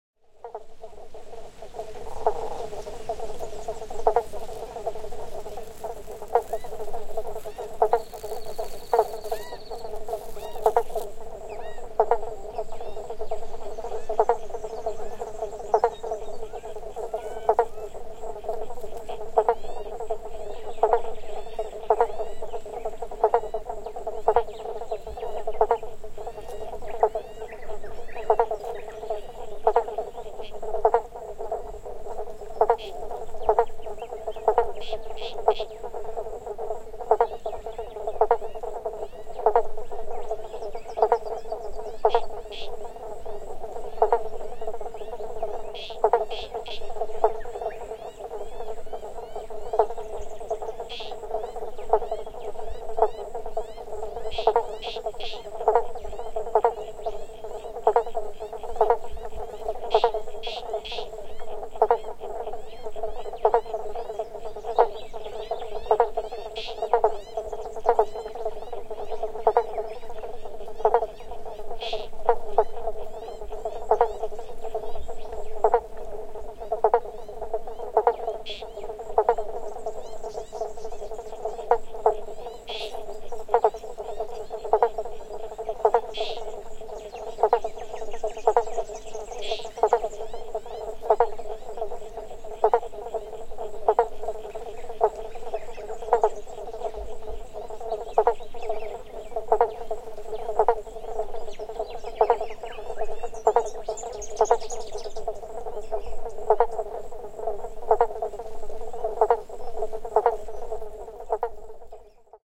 Pond Life
This was recorded at Lake Haridas in Fryers Forest, October 2010 after ten years of drought. Water at last! Listen closely and you can hear the dragon fly wings as they flutter past.
pond, Water, field-recording, australian, nature, insects, lake, birds, dragon-fly, atmos, Australia, frogs